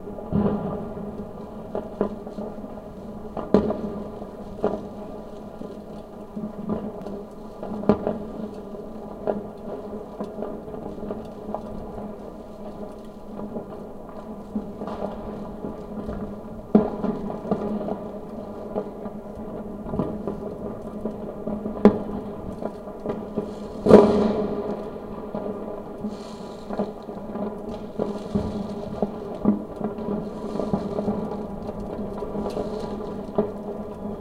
from my bathroom I recorded with a ZOOM H2N fireworks through te ventilation shaft. A special filter is the result. No editing or fx...
explosion, fire-works, fireworks, inside, shaft, ventilation